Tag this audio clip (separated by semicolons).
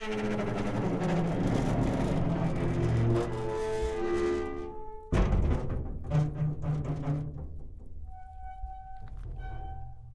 bangs; gate; large; metal; rattles; squeaks